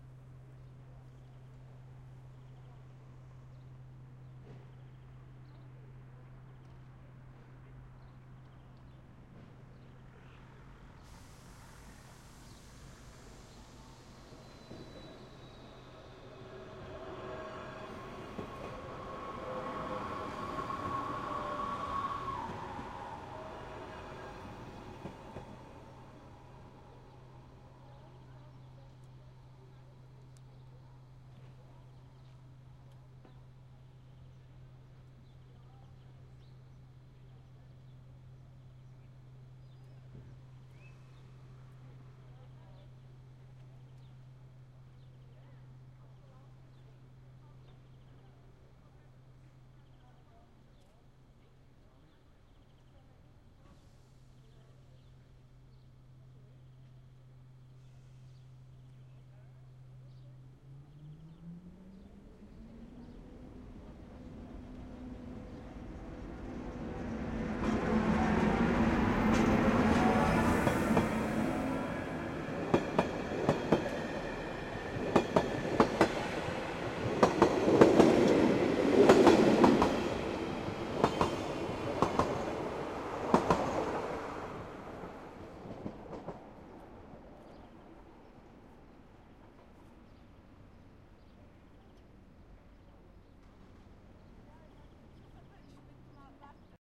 Trains coming and going
Trains coming to the station and going. Recorded with a Zoom H2
ambience, cars, engine, field-recording, movement, rails, trains